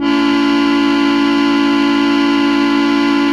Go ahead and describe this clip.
An old brown plastic Wind Organ (?)-you plug it in, and a fan blows the reeds-these are samples of the button chords-somewhat concertina like. Recorded quickly with Sure Sm81 condenser thru HB tube pre into MOTU/Digi Perf setup. A Major.
electric, organ, plastic, wind